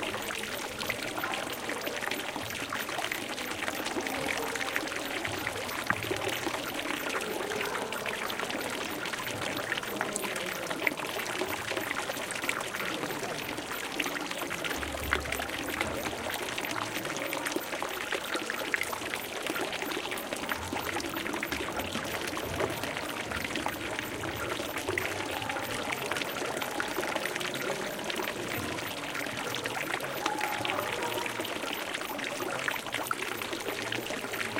In the inner patio of the church we found a fountain and recorded it.
You can hear lots of tourists in the background. The three files have
been recorded from different angles.
humans, fountain, water, field-recording, barcelona, dripping, church, people, ambience